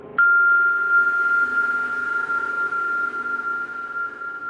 glockenspiel F pad
I couldn't find any real and free glockenspiel sounds,so I recorded my own on my Sonor G30 glockenspiel with my cell phone...then I manipulated the samples with Cubase.I hope you like them and do whatever you want with them!
one-shot, percussion, hit, metal